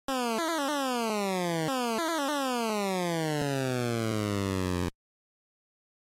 game over
Something small made with FamiTracker. Nothing else was used besides that software. It is a simple and free program so if you're interested check it out.
8-bit, classic, game, old, over, school